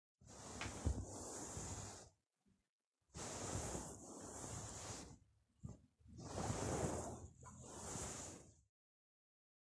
21. Roce de ropa
clothes, fabric movement
clothes, fabric, movement